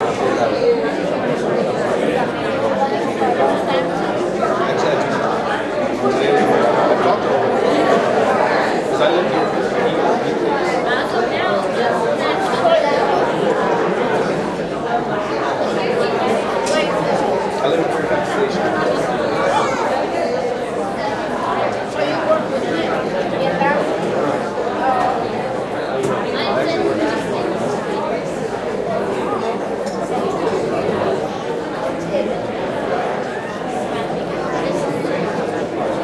Crowd Noise 4
This was recorded at a wedding and celebration party afterward. Several hundred people talking in a very large room. The one is of with the cutting of the cake. It should be random enough to be used for most any situation where one needs ambient crowd noise. This was recorded directly from the on board mic of a full hd camera that uses Acvhd. What you are listening to was rendered off at 48hz and 16 bits.
crowd, noise, party, people, sounds, talking